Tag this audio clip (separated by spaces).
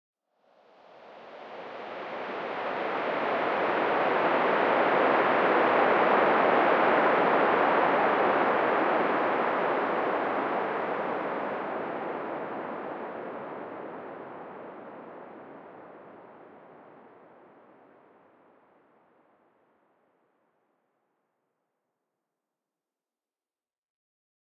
abstract effect fly future fx sfx sound-design sounddesign soundeffect swish swoosh woosh